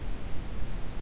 brown noise raw
part of drumkit, based on sine & noise